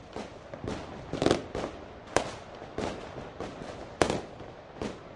delphis FIREWORKS LOOP 12 ST
Fireworks recording at Delphi's home. Outside the house in the backgarden. Recording with the Studio Projects Microphone S4 into Steinberg Cubase 4.1 (stereo XY) using the vst3 plugins Gate, Compressor and Limiter. Loop made with Steinberg WaveLab 6.1 no special plugins where used.